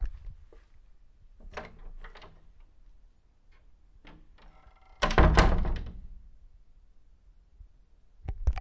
Door opening and closing 3
Door opening and closing